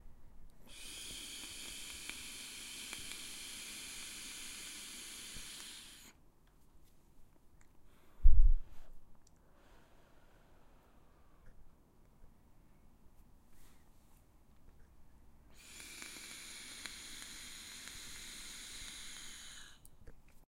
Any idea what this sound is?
Man inhale and exhale vape
vapor being inhaled and exhaled
air, breath, breathe, breathing, exhale, human, inhale, mouth, owi, smoking